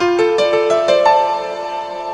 piano riff 01
Recorded in cAVe studio Plzen 2007.
you can support me by sending me some money:
keyboard, smooth, reverb, arpeggio, piano, keys, ambient, chillout, verby, loopable, dream, fantasy, riff